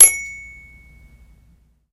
My toy piano sucks, it has no sustain and one of the keys rattles. This really pisses me off. So I hit the working keys like an xylophone for those unimpressed with my other versions.
piano, toy